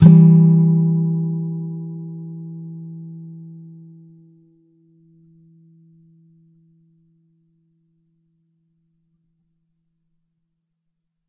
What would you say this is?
A (7th) string 7th fret, and D (4th) string 5th fret. If any of these samples have any errors or faults, please tell me.